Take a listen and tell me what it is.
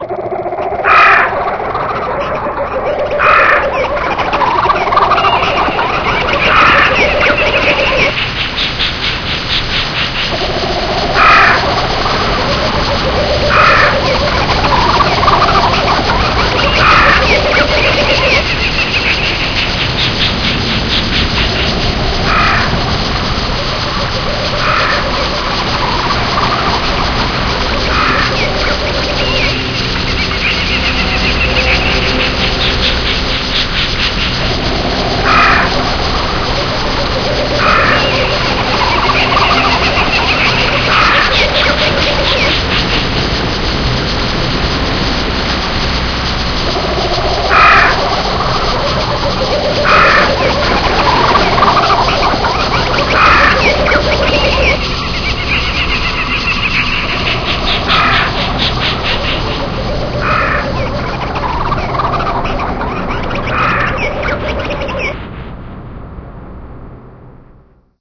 A jungle soundscape. Enjoy!